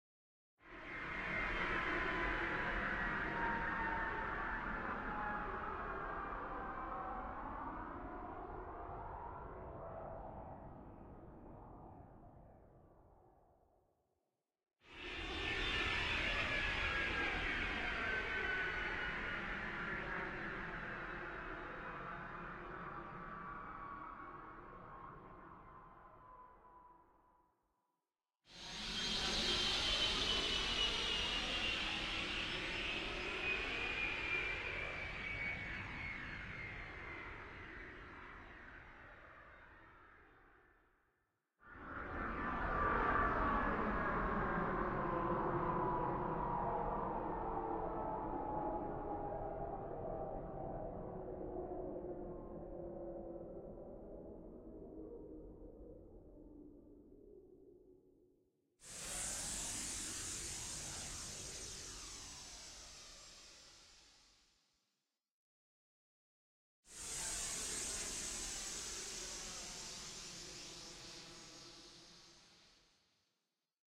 Airplane Flying Airport
A couple of airplanes flying on and off.